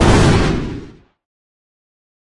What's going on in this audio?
Rocket Blast
A more "airy" explosion sound effect. Made from an assortment of sources such as carbonation pressure (soda openings), filtered recordings of the mouth and white noise. One animator actually used this as a rushing cartoon sound effect so its use can be as varied as you can imagine.